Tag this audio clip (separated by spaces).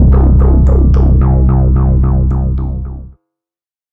110 808 909 acid bass bounce bpm club dance dub-step effect electro electronic glitch glitch-hop hardcore house noise porn-core sound sub synth techno trance